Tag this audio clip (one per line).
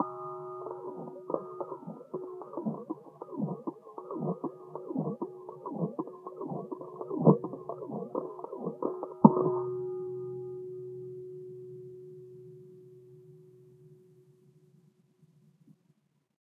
bowl
metal
scrape